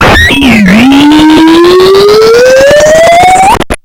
Up the slide
glitch, core, circuit-bent, rythmic-distortion, coleco, just-plain-mental, murderbreak, experimental, bending